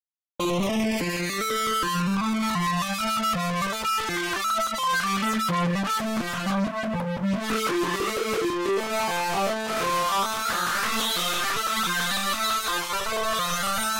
treated synthesiser riff distort
riff, electronica, synth, soundscape